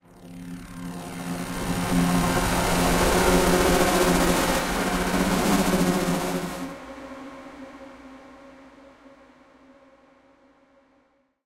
Sci-Fi - Effects - Ambience, interference 03
cinematic, whoosh, charging, sfx, buzzing, power, ui, circuits, field-recording, fuse, interface, space, Sound-design, soundscape, spaceship, neon, drone, noise, interference, engine, sci-fi, hum, scifi, Sound-Effect, swoosh, ambience, futuristic, electricity, buzz, transition